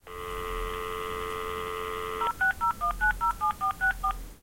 Sound of phone dialing. Sound recorded with a ZOOM H4N Pro.
Son d’un téléphone lors de la numérotation. Son enregistré avec un ZOOM H4N Pro.
telephone, mobile, dialing, phone, calling, call